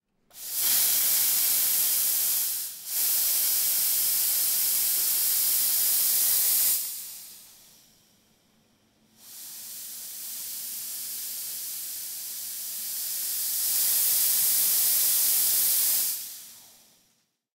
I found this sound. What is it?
Steamer - Mono
Steamer recorded in mono.
Microphone: Rode NTG2